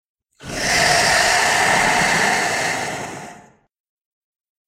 Utahraptor Hiss
Made for a primitive war comic dub